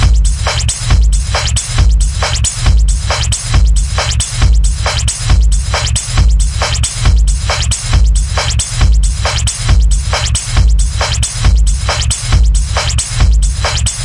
This is my own composition. Made with free samples from the internet, made loops with it, and heavy processing through my mixer and guitaramp, and compressor.